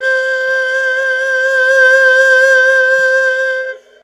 The Erhu is a chinese string instrument with two strings. Used software: audacity
Erhu, Violin, Chinese-Violin, Strings